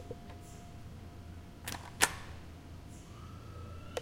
Button Press 2
A recording of an elevator button press at night.
press, elevator, button, field-recording, night